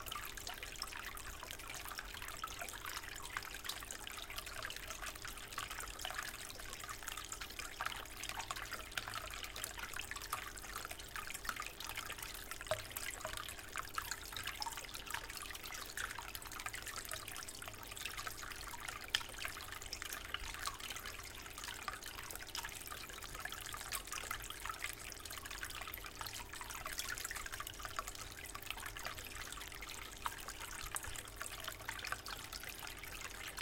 Water Stream (Looped)
Water stream recorded from a tiny indoor pond
water, loop, trickle, stream